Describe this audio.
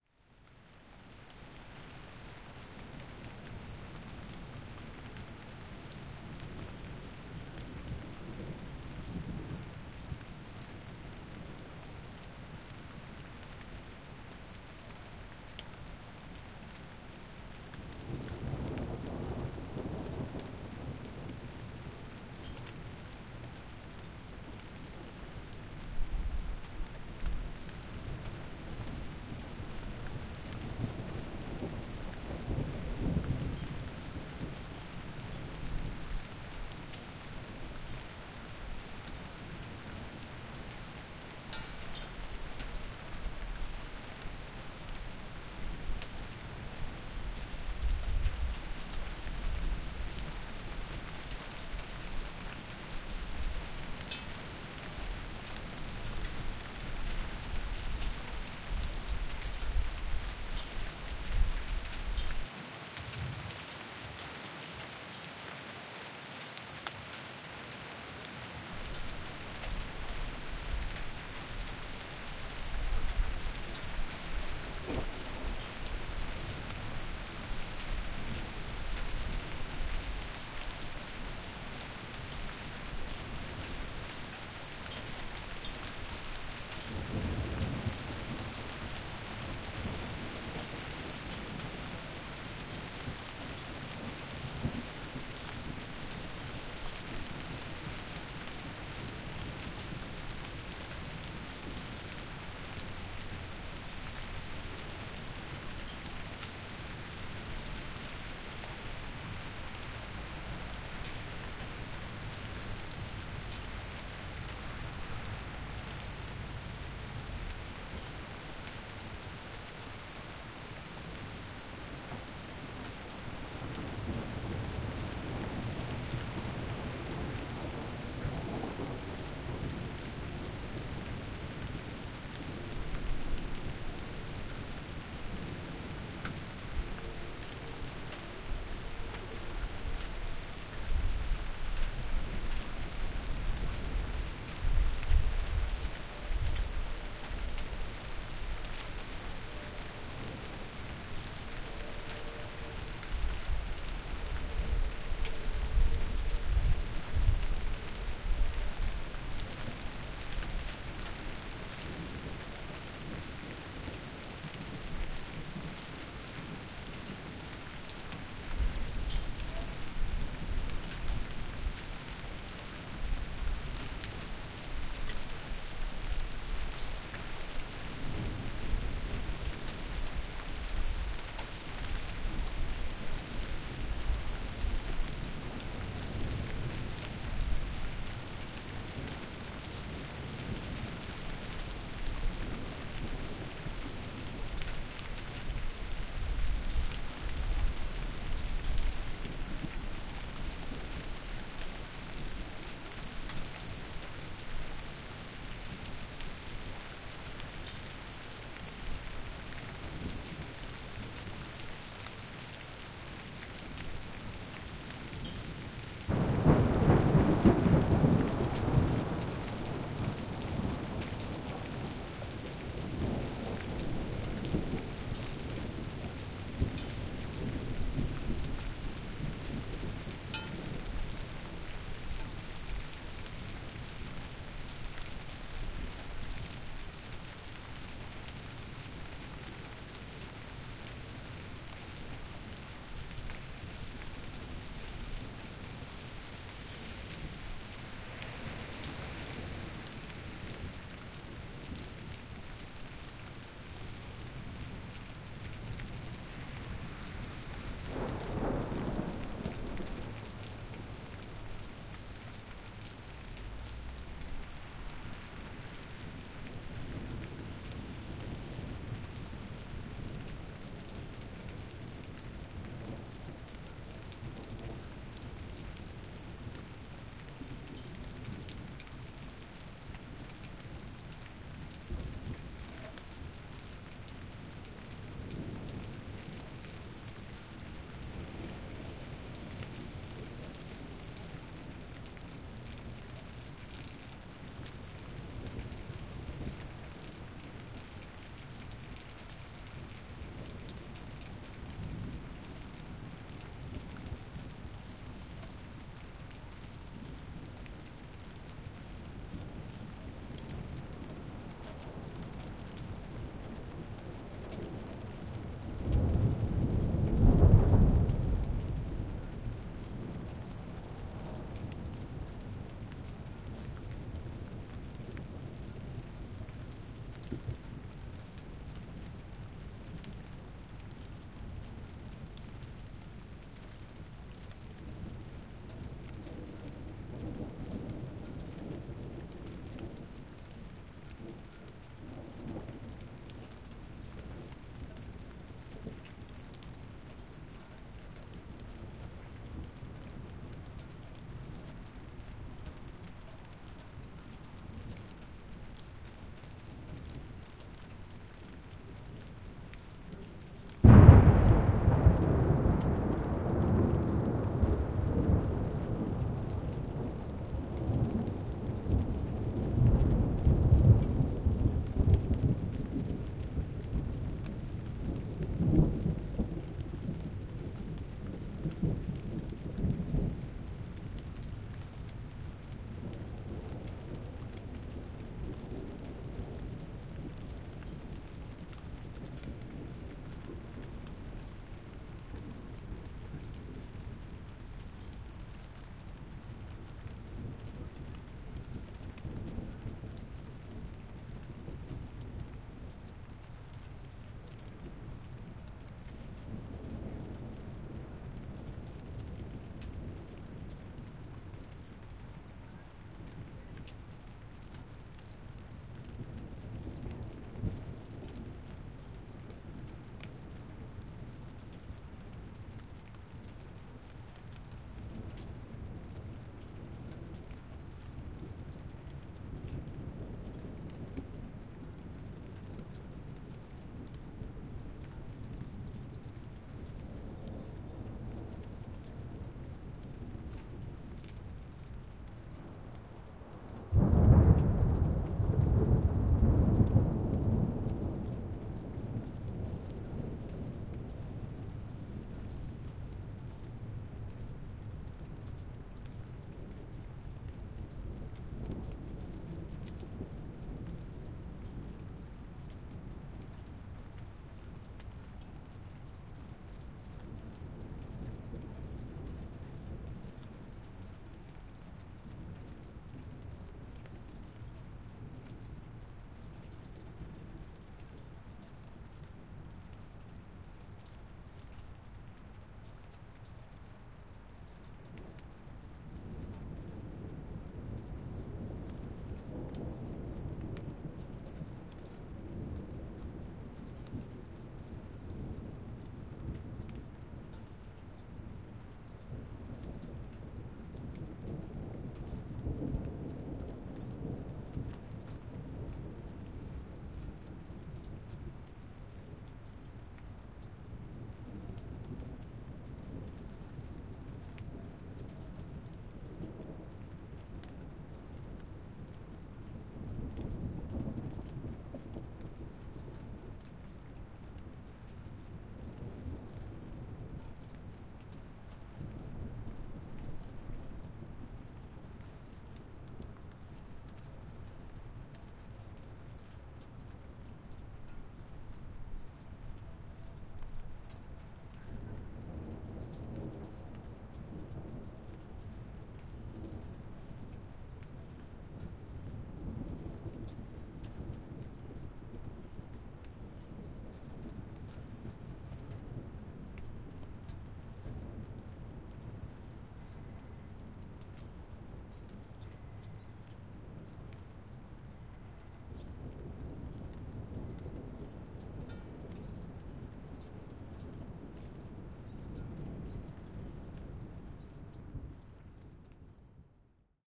early spring storm
This is a segment of a larger recording of a thunderstorm from March 24, 2012, in Greensboro, North Carolina, USA, including some small hail. This was taken on the night of March 24, 2012, with an Olympus LS100 and an Audio Technica AT-822 single-point X/Y stereo microphone.